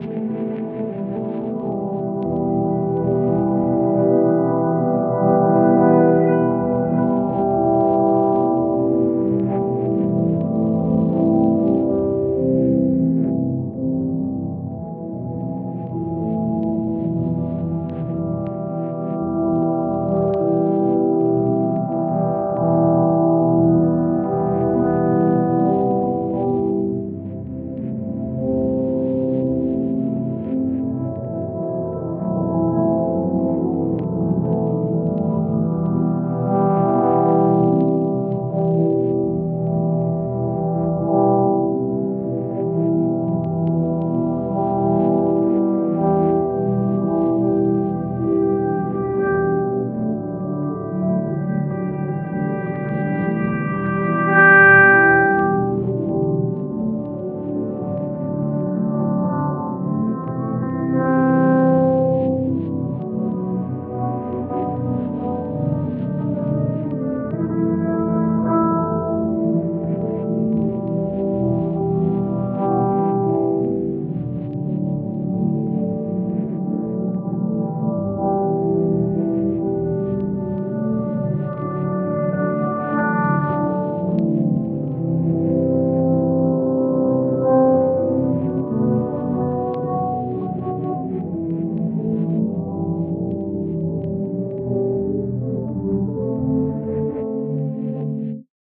Processed Synth Chord Progression
Synth Processed Experimental Drone Ambient Atmosphere
Processed, Experimental, Atmosphere